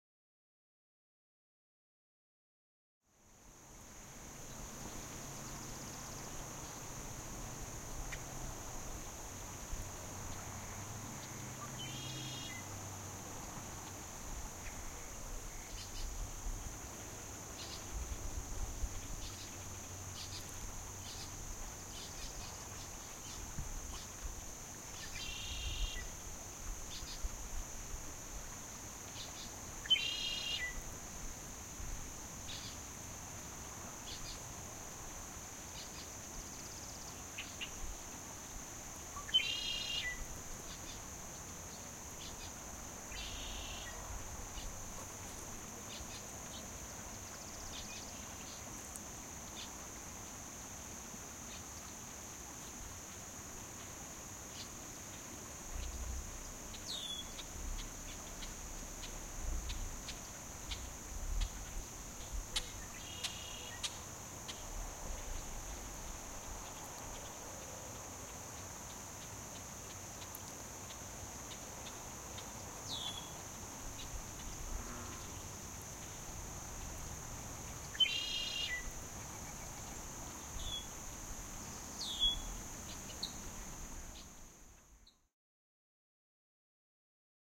Redwing Blackbirds and other birds along the Santa Clara River in Tonaquint Park.
St George, Utah
Tascam DR-40 portable recorder